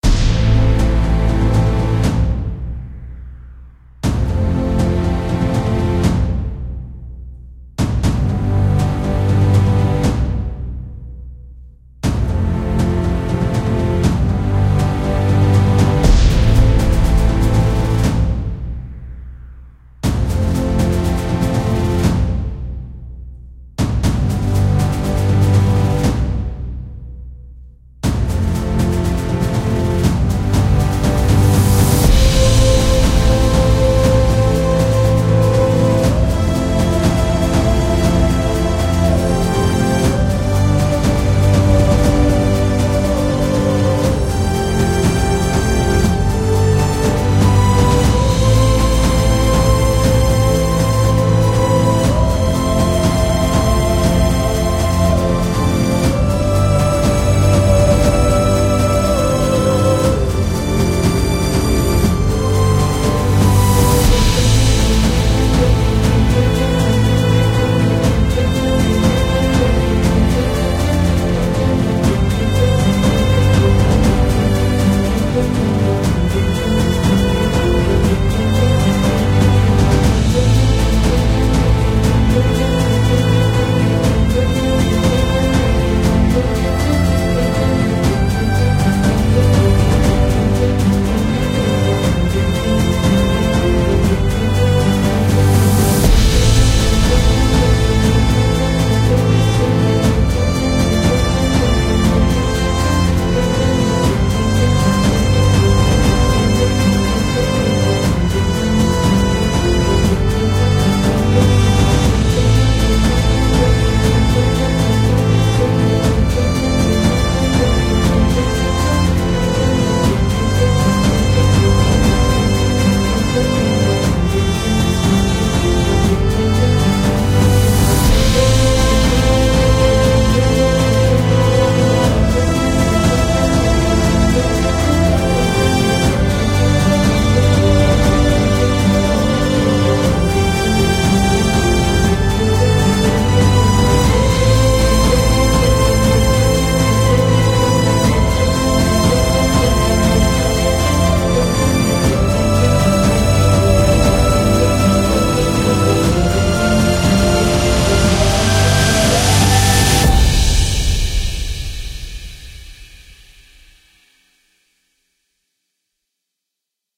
Cinematic Music - Relinquish
A very old cinematic track i made, never got sold and used in a production.
Maybe it will have a purpose on here.
I hope anyone could make use of this sometime.
Enjoy.